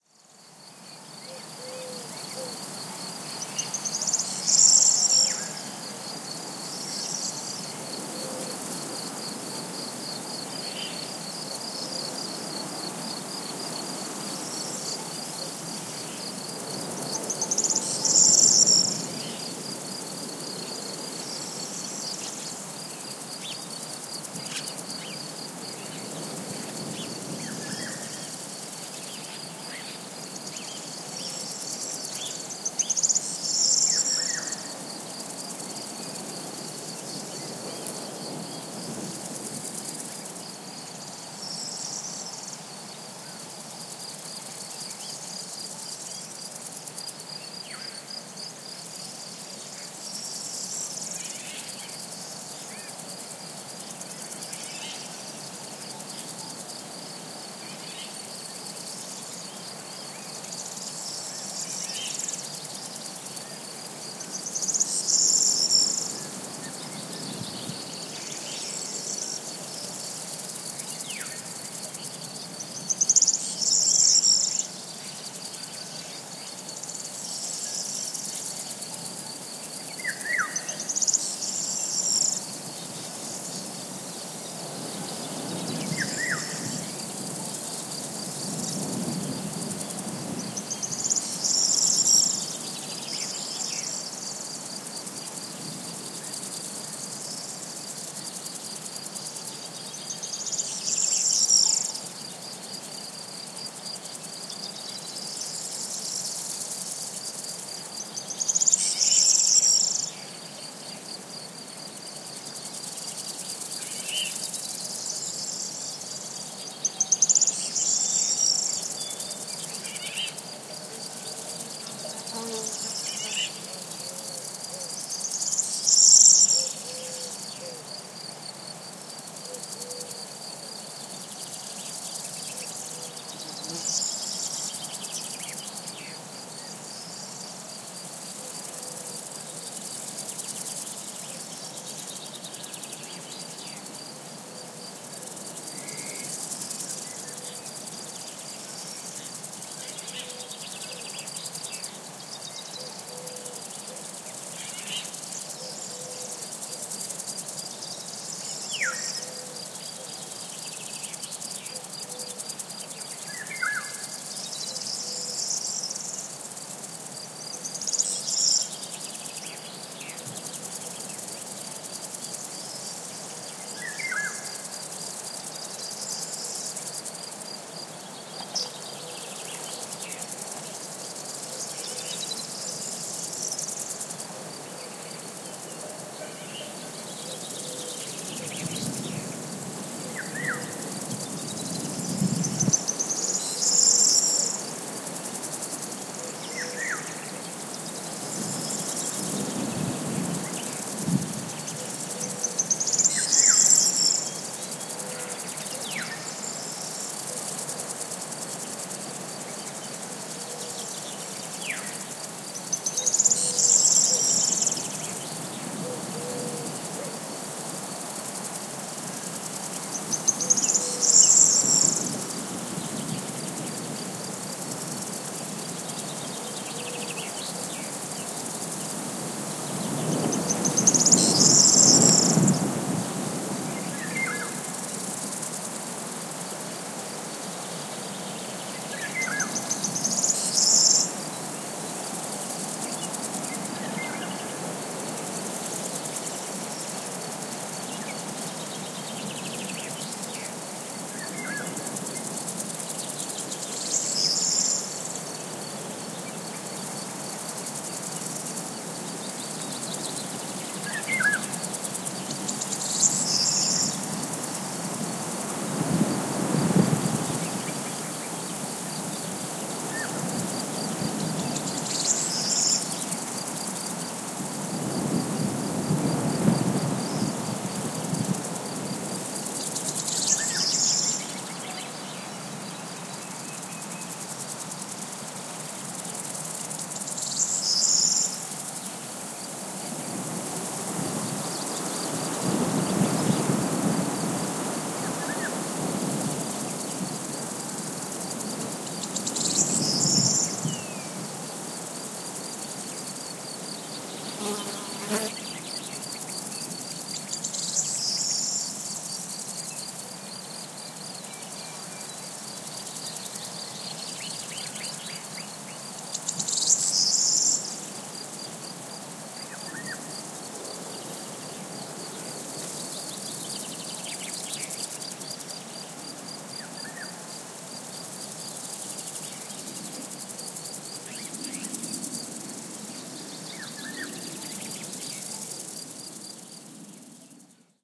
20190510.dehesa.day.windy.67
Spring ambiance on open Oak woodland (Spanish 'dehesa', Portuguese 'montado'), with singing birds and crickets, distant sheep bells, and soft murmur of wind on trees. EM172 Matched Stereo Pair (Clippy XLR, by FEL Communications Ltd) into Sound Devices Mixpre-3. Recorded near Menhir da Meada, the largest standing stone in the Iberian Peninsula, near Castelo de Vide, Portugal
dehesa, ambiance, south-spain, spring, wind, birds, forest, nature, insects, field-recording, montado